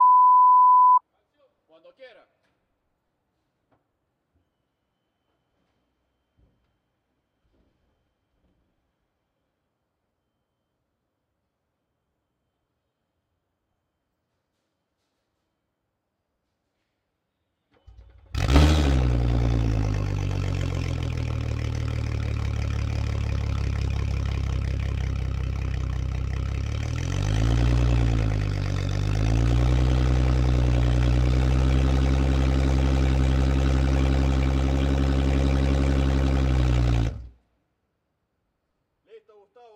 Motor 2 TASCAM 0245
A single Mercury Verado 300 Hp outboard motor, recorded with Tascam DR-60D. Starting and running out of water.
300, boat, Hp, Mercury, motor, Outboard, Verado